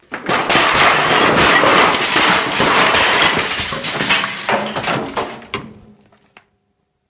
Light Metal Crash
A small crash sound I recorded and mixed in Adobe